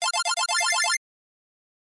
8-Bit Coin Or Power Up FX For Retro Video Games
One of those coin or power up FX from games like Super Mario Bros. and such. 👾👾 WITH THIS SOUND, YOU CAN MAKE YOUR CHARACTERS INVINCIBLE!!!!!!
Made with Harmor in FL Studio 20.
games, 8-bit, retro, mario, coin, sounds, 8-bits, animation, power, chiptune, up, animate, fx, nintendo, rhytm, sega, bit, robot, 8bit, gameboy, atari, special, game